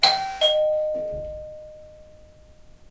a simple door bell